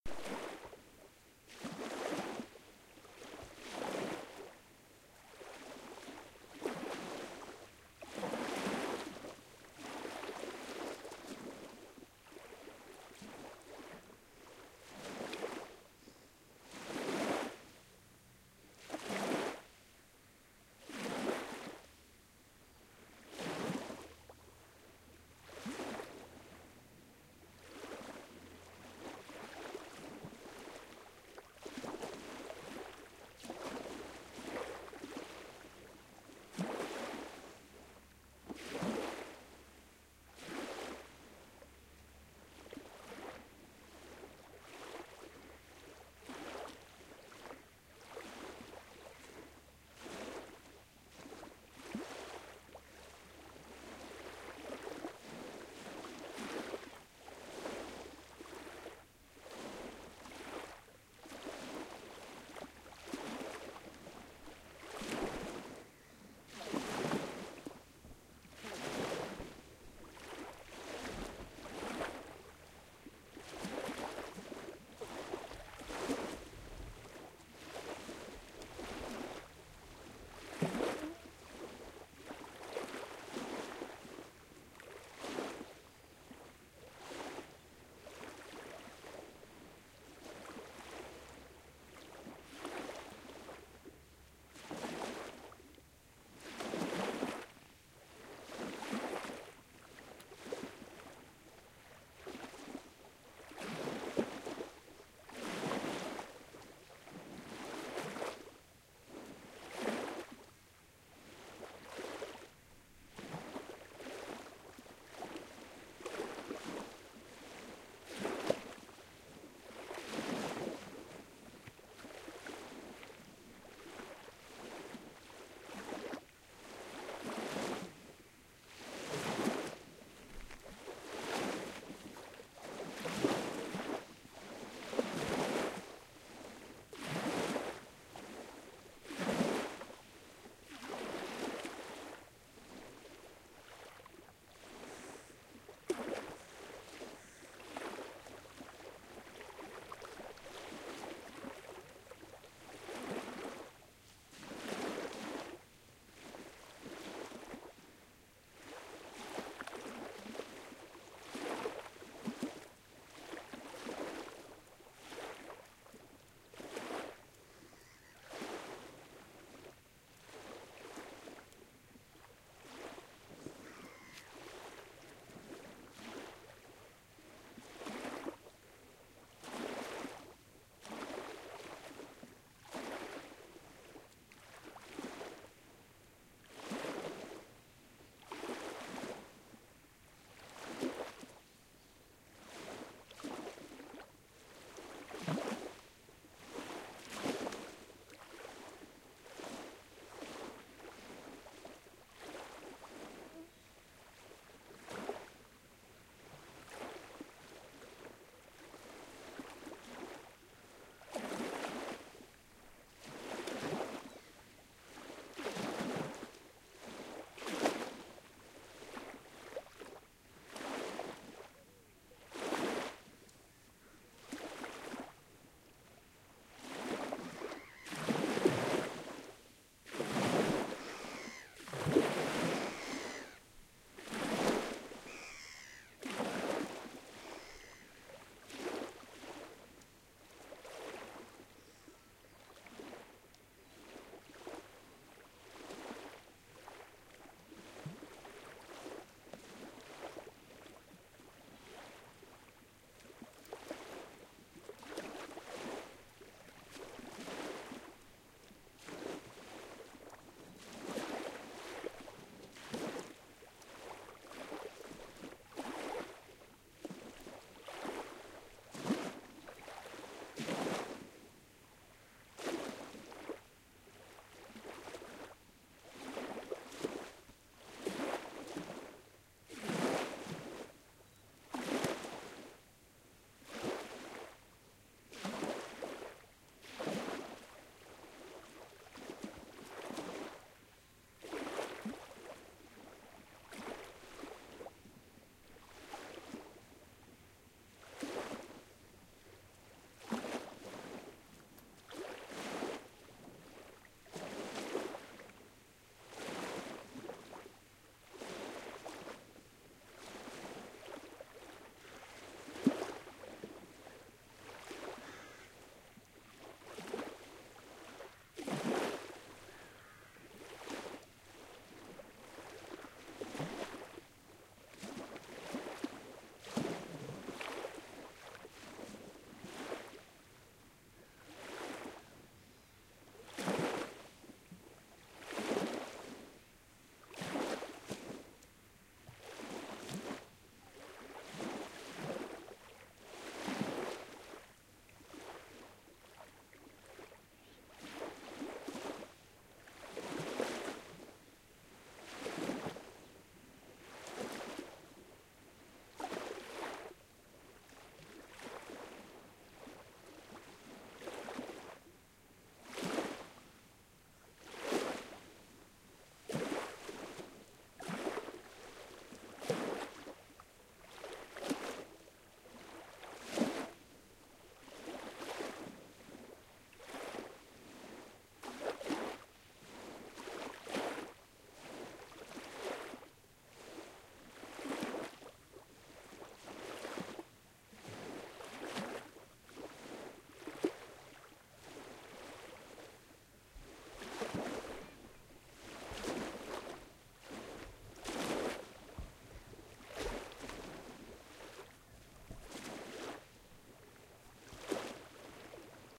issyk kul - 22-7-2005
Recorded one wonderful morning at the shores of Lake Issyk Kul, Kyrgyzstan. Three hours from its capital Bishkek, the lake with its little bit salty water and the mountainous landscape is a wonderful place to rest and relax. Recorded with Sony RH910 Hi-MD recorder and Sony ECM MS907 Stereo Microphone at 120°.
yssyk-kol, lake, waves, issyk-kul, shore, kyrgyzstan